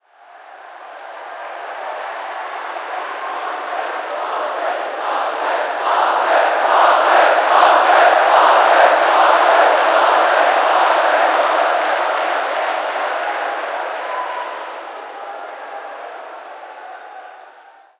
Crowd Cheering - Rhythmic Cheering
A sound of a cheering crowd, recorded with a Zoom H5.
audience, cheering, entertainment, event, hall